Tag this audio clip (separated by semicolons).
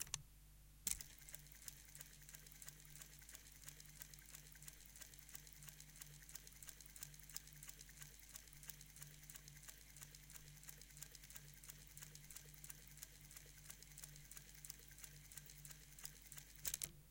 industrial; machinery; machine; POWER; coudre